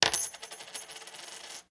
Silver Quarter 6
Dropping a silver quarter on a desk.